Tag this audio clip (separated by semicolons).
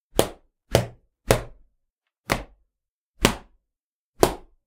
fighting; hits; punches